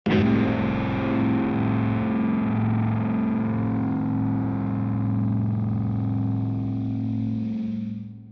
short guitar transitions one note distorted
Recorded with Epiphone sheraton II pro into a Mixpre 6 via DI box, cleaned up and effects added.